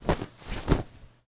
Opening a canvas tent, (no zipper).